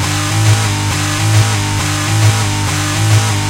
cyborgattack 03 135bpm
Alvarez electric guitar through DOD Death Metal Pedal mixed into robotic grind in Fruity Loops and produced in Audition. Originally recorded for an industrial track but was scrapped. (no pun intended)
machinedubstep, grind, industrial, glitch